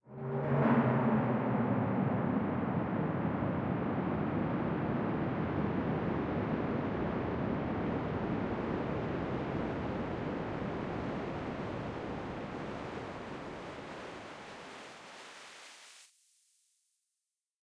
Long Drum Hit Woosh
Long Wossh made from classic drum hit.
abstract, Swish, sounddesign, soundeffect, sound, effect, sfx, Woosh, fx